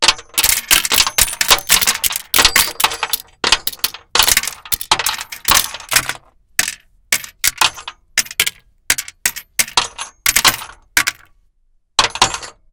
This was created as the sound of bullets hitting robots. I dropped coins on a music stand and then pitch shifted them. Oktava 012 microphone through Neve preamp and Apogee Mini-Me A/D.